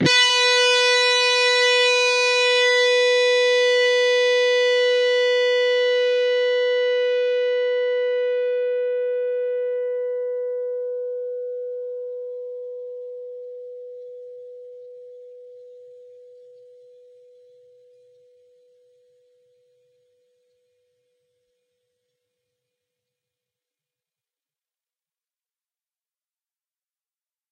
Dist sng B 2nd str 12th frt
B (2nd) string, on the 12th fret.
distorted, distorted-guitar, distortion, guitar, guitar-notes, single, single-notes, strings